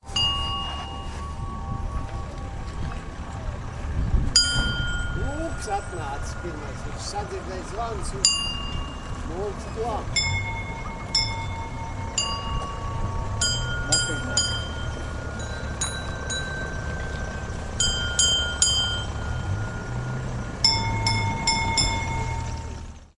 Baltic market place
Recorded using portable digital recorder